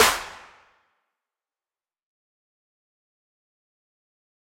Ponicz STFU Snare
Layered by me snare/clap similar to snare/clap used by Ponicz in his track "STFU"
Riddim, Ponicz, Drums, Snare, Dubstep, stfu, Clap